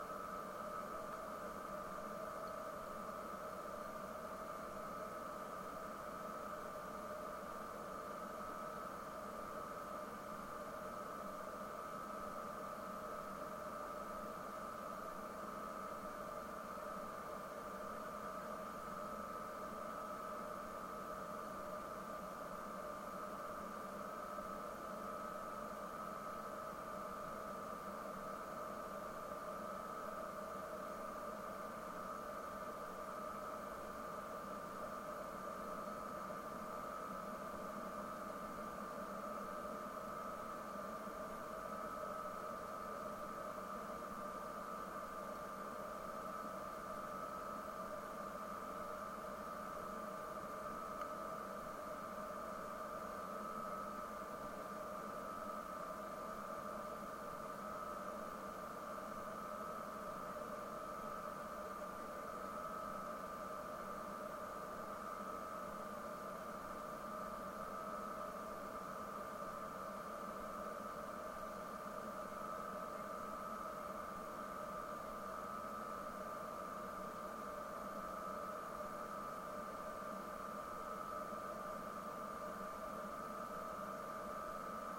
airplane-interior soft
this bank contains some cabin recordings by a contact mic placed in different locations.
recorded by a DY piezo mic+ Zoom H2m
air-berlin, aircraft, airplane, aviation, cabin, contact, flight, jet, linate, mic, plane, taxiing, tegel, window